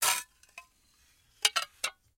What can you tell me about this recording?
Small glass plates being scraped against each other. Rough and articulated scraping sound. Close miked with Rode NT-5s in X-Y configuration. Trimmed, DC removed, and normalized to -6 dB.